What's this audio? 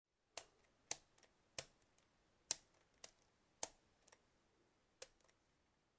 se deja caer un cubo de rubik y rebora un par de veces e el suelo
cubok, rubik